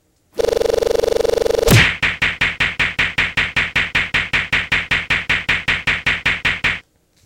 punch remake
A cool remix of a punch.
bang, battle, fight, punch, punching, series